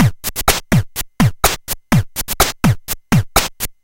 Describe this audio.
Beats recorded from the Atari ST